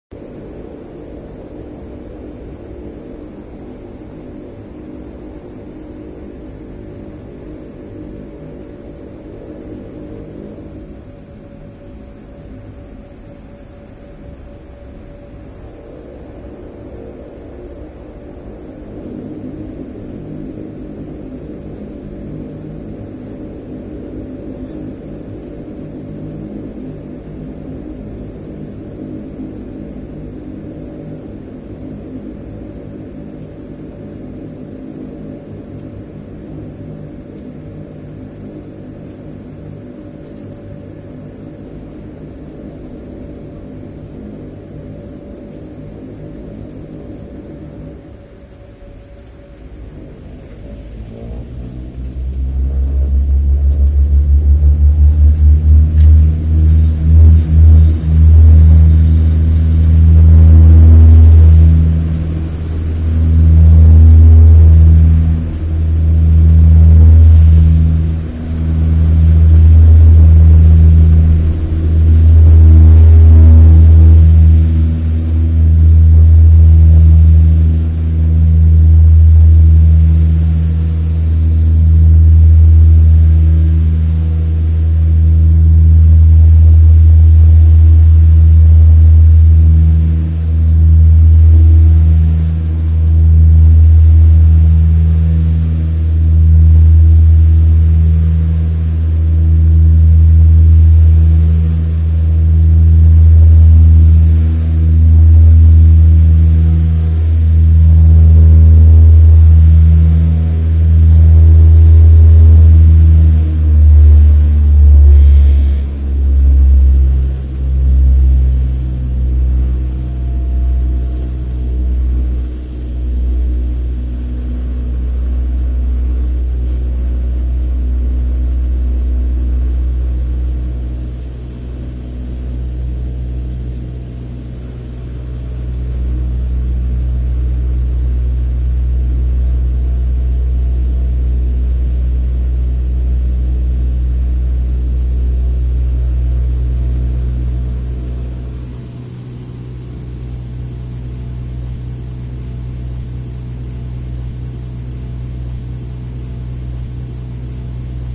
Bombardier Dash 8-300 Runway Takeoff Cruise
Recording from inside the passenger space of the twin turboprop engines of a Bombardier Dash 8-300 aircraft while on the runway, during takeoff, and during the transition to cruise at altitude
8-300, Aircraft, Bombardier, Cruise, Dash, Flight, Propeller, Runway, Sound, Takeoff, Turboprop